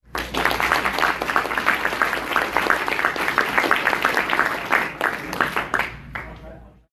Small audience applause as local official is announced before a speech.
clapping, crowd, small, indoor, group, audience, applause